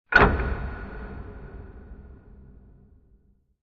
Tile of mistary
A fitting key for the gate to limbo
clonky, key